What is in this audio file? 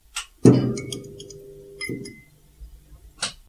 Fluorescent lamp start 10
fluorescent tube light starts up in my office. Done with Rode Podcaster edited with Adobe Soundbooth on January 2012
switch, start, office, light, fluorescent